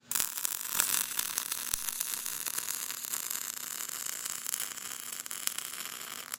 The sounds of welding
welding, industry, factory, machinery, field-recording